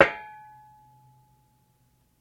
Chair-Folding Chair-Metal-Back Hit-01
The sound of a metal folding chair's back being flicked with a finger.